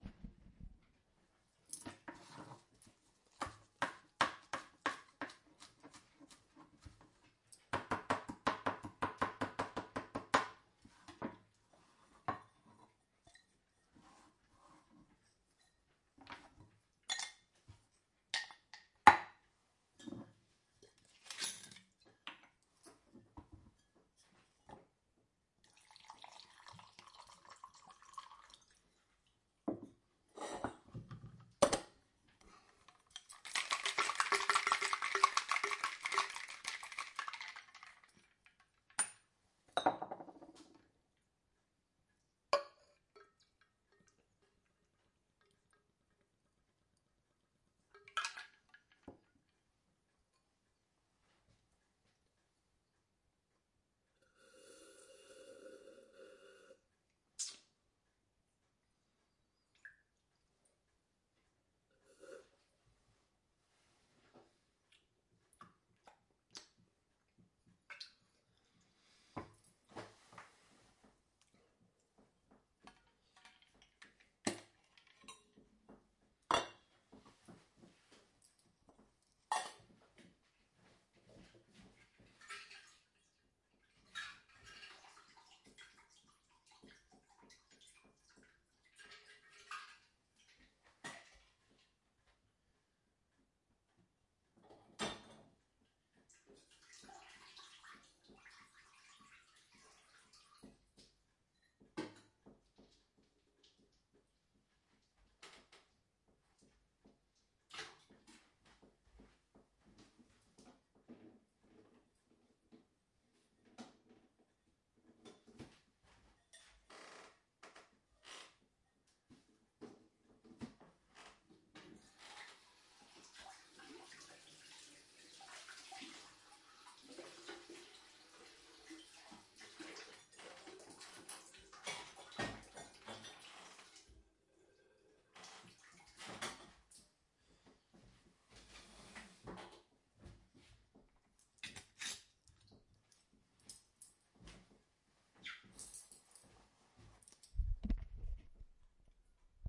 Cocktail making
Making a cocktail from scratch - includes chopping mint, pouring booze into shaker, adding ice and other ingredients, than shaking and pouring.
chopping, cocktails, ice